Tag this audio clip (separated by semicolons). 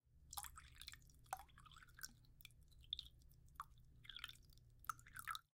water; blood; drops; dripping